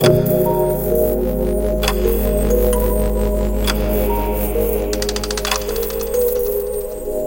Skyrunner-The Timelab
This is a seamlessly looping soundscape I made for a competition.
Making it I had a mysterious place in mind where time itself is forged, developed or altered.
By delphidebrain:
By 3bagbrew:
By Martineerok:
Clock bell
By timgormly:
Thank you guys.
I hope someone finds it useful someday.^^
cheers
Skyrunner